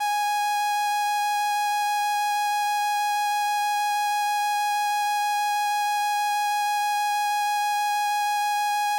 Transistor Organ Violin - G#5
Sample of an old combo organ set to its "Violin" setting.
Recorded with a DI-Box and a RME Babyface using Cubase.
Have fun!